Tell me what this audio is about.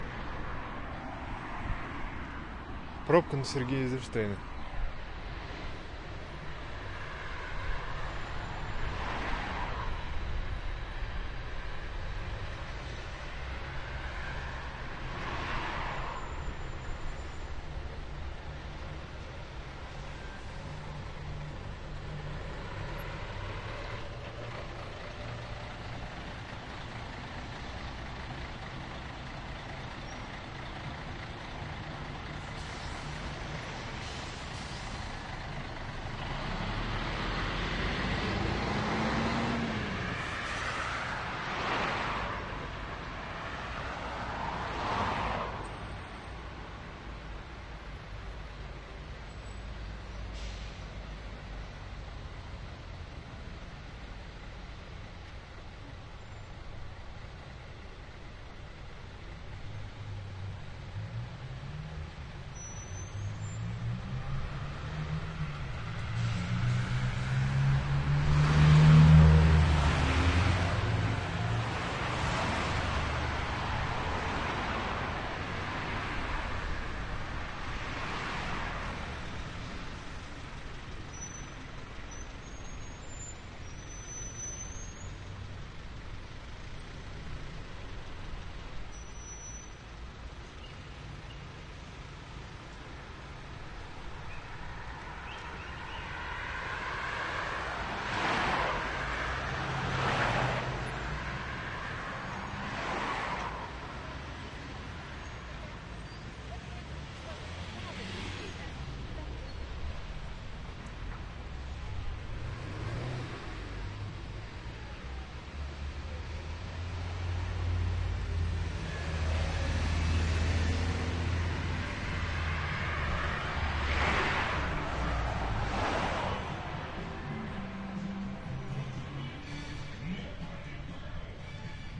Traffic jam on Sergeya Eizenshteina street. Two C74 mics, Sound Devices 552. Lot of heavy autos passing by
moskow, field-recording, truck